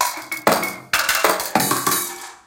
loop mehackit 2
An effected loop of Mehackit’s Music Machine drumming various objects with solenoids. Made for Sonic Pi Library. Part of the first Mehackit sample library contribution.
drums, rhythm, sounddesign, sci-fi, solenoid, sample, future, drum-loop, metallic, music, sound-design, drum, electric, effect, machine, robot, digital, mehackit